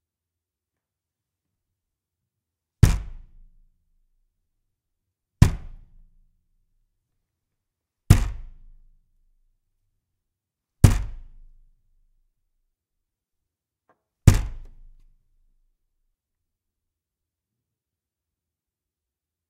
punch at the wooden door
punch, knock, door, wooden